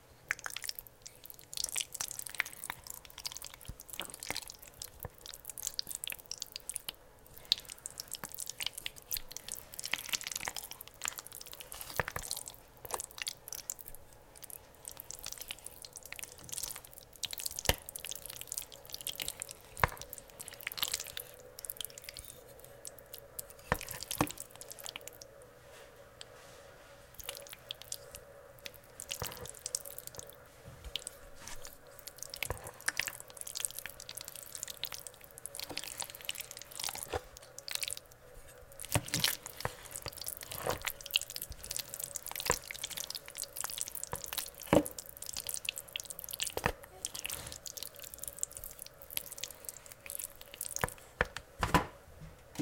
This gross sound is me stirring some very thick chili. Recorded with AT2020 into Zoom H4.